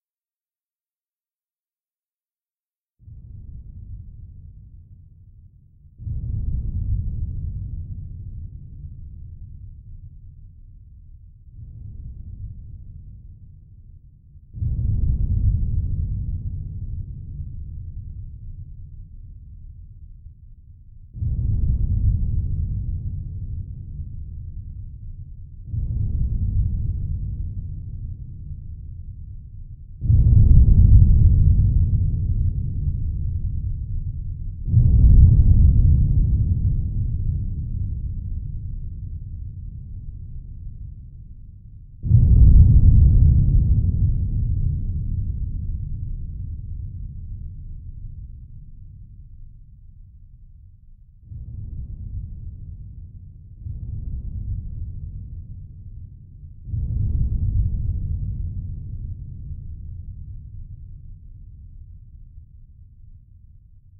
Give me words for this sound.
distant explosions coming in and fade out
agression, ambient, army, artillery, attack, bang, bomb, boom, cannon, canon, coming, conflict, defense, distant, drone, explode, explosion, explosive, gun, hit, horror, impact, military, projectile, scifi, shot, war, weird